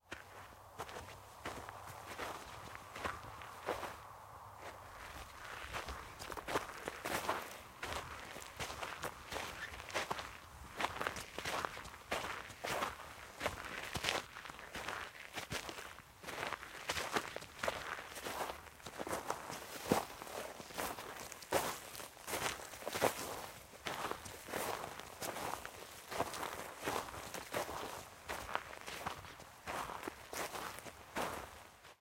walking forest icy ground foley trousers rustle
Recorded using a Zoom H4n and Audio Technica shotgun mic with windsock and shock mount. Recording of footsteps on forest walk over frosty ground.